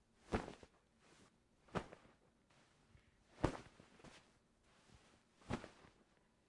Falling on the Bed
falling onto a bed
bed, falling, light